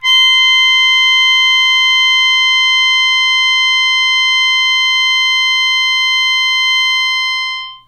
a multisampled hohner melodica. being too lazy, not every key has been sampled, but four samples/octave should do it...
melodica, instrument, acoustic